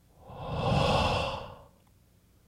breathe out (3)
A single breath out
Recorded with AKG condenser microphone M-Audio Delta AP
air, breath, breathing